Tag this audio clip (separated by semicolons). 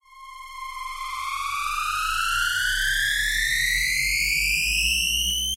club; dance; samples